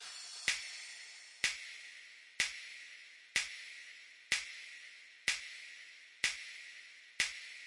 Crash & Snap
Electric-Dance-Music, Sample, Electric, Loop, Music, EDM, Percussion, 125-BPM, Dance